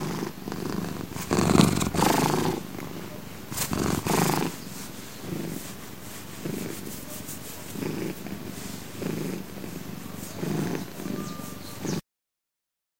cat cute sleep